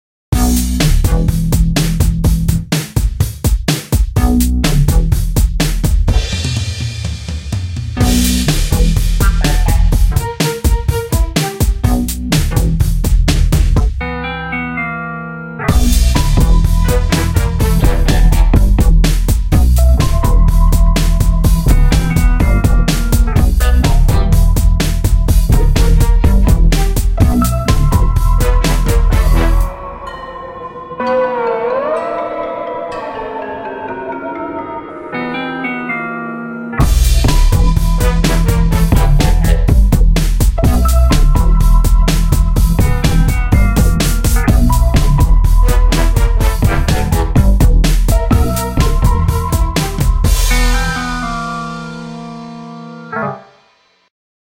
An instrumental piece I made in GarageBand for something called Victors Crypt!
I combined a beat I made on a drum machine with one I made on sampled drums to make it really swing. I added a cocky simple bassline to build more sounds to. Strings, horns and synth sounds were added as well....
Could be useful in anything cool, intro, outro, game, dark, eerie, spooky, creepy, scary, horrific, mysterious, crime-ish, thrilling or whatever comes to mind.